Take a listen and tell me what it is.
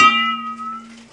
pot without transient
i am thwacking a pot on a table and there is water in it oh boy